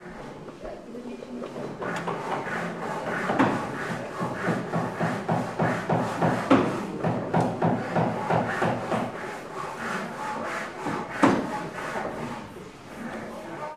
TOOLHand-int-mallet-saw ASD lib-zoom-zabojeva-Anna
woodworking workshop sounds of hammering mallet and hand saw on wood
handtools, wood-saw